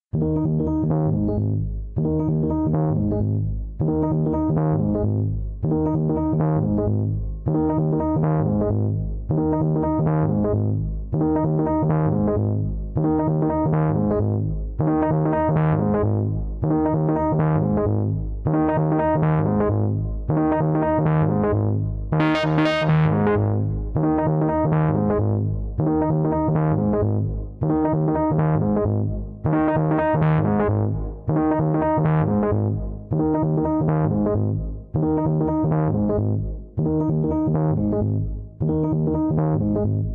Ableton sound created with a few effects.
ableton, dance, dub, loop, organ, synth, techno